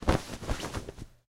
Canvas Tent 1
Opening a canvas tent, (no zipper).
tent, canvas, rustle, camping, fabric